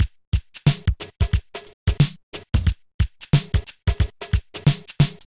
Drumloop - Free Try 1 (90 BPM)
With swing, to combine with the others "Free Try" ones.
Created with FrutyLoops Studio.